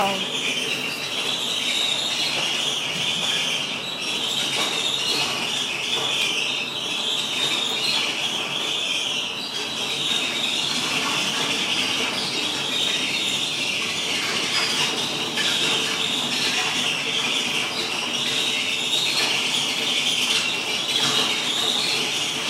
a very old tractor doing roadworks in a Kiev street, recorded with an iPhone4
roadworks, tractor, street, field-recording, ukraine